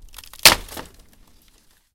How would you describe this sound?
Snapping, Wooden Fence, D
Raw audio of snapping a wooden fence panel. I needed to get rid of an old fence, so might as well get some use out of it.
An example of how you might credit is by putting this in the description/credits:
And for similar sounds, do please check out the full library I created or my SFX store.
The sound was recorded using a "H1 Zoom V2 recorder" on 21st July 2016.
break, crack, cracking, fence, fences, snap, snapping, wood, wooden